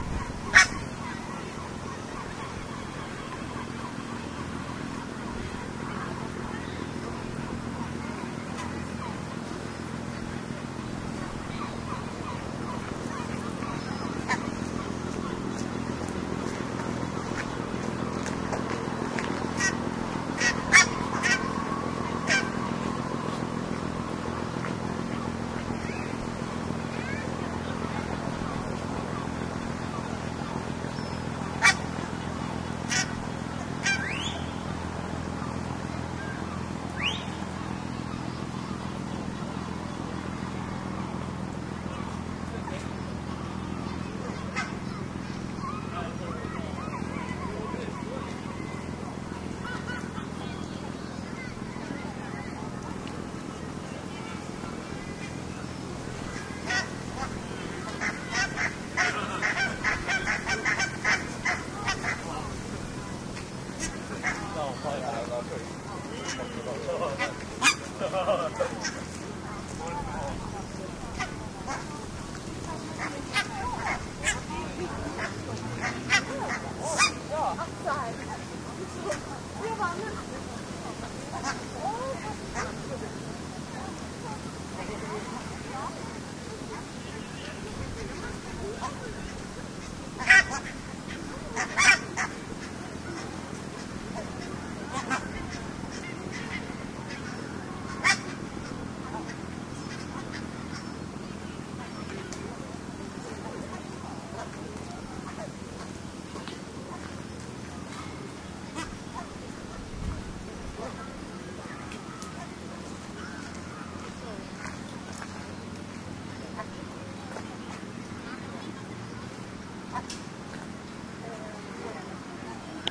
20100806.stockholm.park
park ambiance in Stockholm, with Barnacle Geese calling, footsteps on gravel, people talking and city noise (which, the place being in Stockholm, of course includes motor boats). Olympus LS10 recorder, internal mics
stockholm, park, talk, ambiance, geese, field-recording